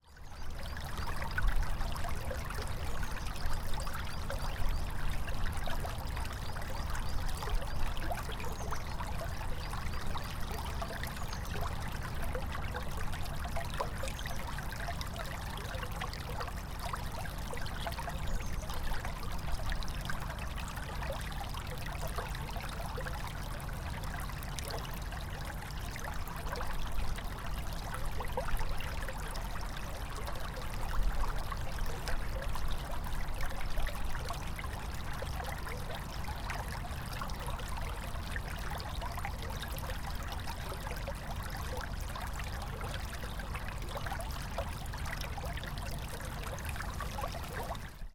A brook found in a vernal forest. Birds can be heard in the background.
Recorded with Zoom H1.
birds, field-recording, nature, spring, stream, water